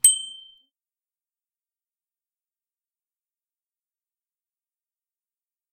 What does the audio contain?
Bicycle Bell from BikeKitchen Augsburg 07
Stand-alone ringing of a bicycle bell from the self-help repair shop BikeKitchen in Augsburg, Germany
bell, ring, traffic, bike, bicycle